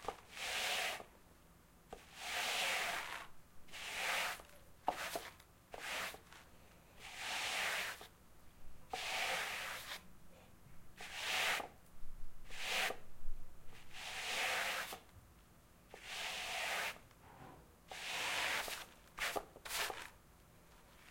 Sweeping on carpet
broom, carpet, sweep, sweeping